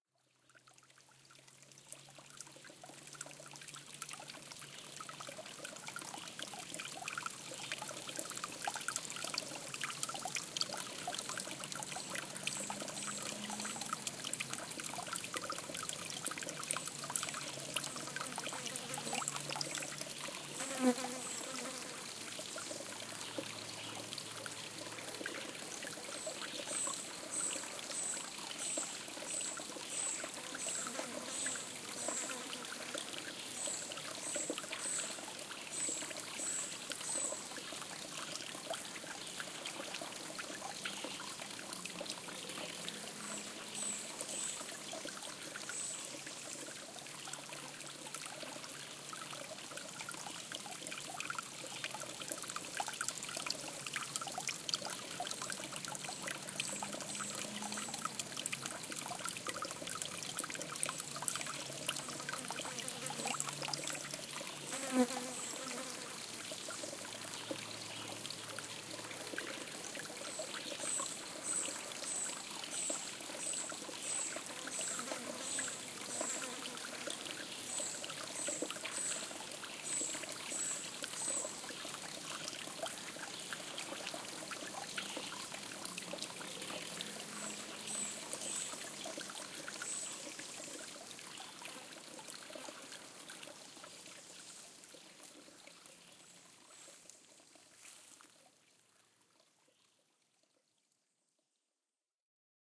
light stream with fly and footsteps
stream water fly nature park forest field-recording